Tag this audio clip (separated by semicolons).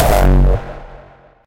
hardstyle; bass; kick